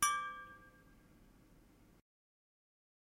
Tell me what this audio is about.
Megabottle - 23 - Audio - Audio 23
Various hits of a stainless steel drinking bottle half filled with water, some clumsier than others.
bottle, hit, ring, steel, ting